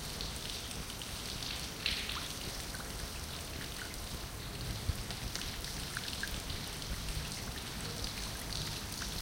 fountain-pigeons-short
A fountain in the cloisters garden of a cathedral. Pigeons flying around. Binaural recording on a Zoom H1.
peaceful, garden, cloisters, summer, pigeons, peace, binaural, cathedral, fountain